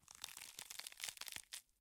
Flesh, intestines, blood, bones, you name it.